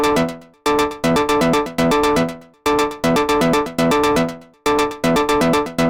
Some recordings using my modular synth (with Mungo W0 in the core)